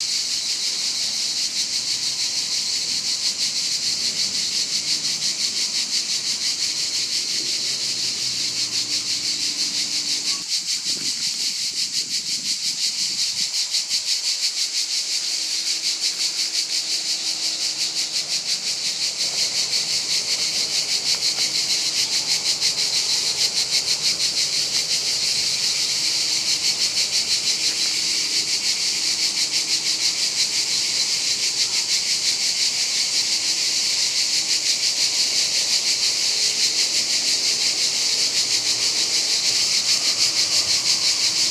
Cicadas recorded in Provence,France
nature, insects, summer, cicadas